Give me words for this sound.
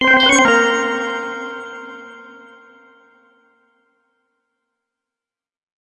I made these sounds in the freeware midi composing studio nanostudio you should try nanostudio and i used ocenaudio for additional editing also freeware
application, bleep, blip, bootup, click, clicks, desktop, effect, event, game, intro, intros, sfx, sound, startup